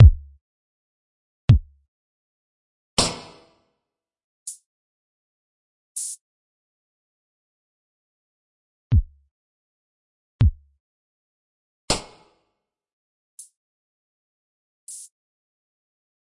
modular synthesis drums
Here are some drums i made, on the synthesizer again. In order they are: Parallel compressed kick, regular kick, snare, closed Hi-Hat and Open Hi-Hat. The set before the break is mastered, while the set after the break isn't (I did throw a little limiter in there though just to boost 'em up a bit easily, but they're still not mastered).